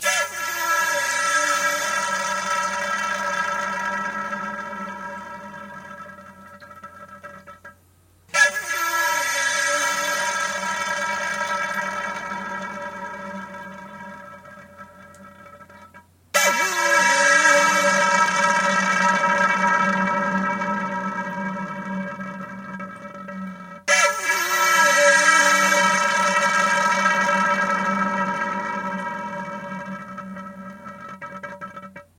hissing faucet
Faucet hissing. Four different attempts.